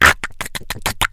A voice sound effect useful for smaller, mostly evil, creatures in all kind of games.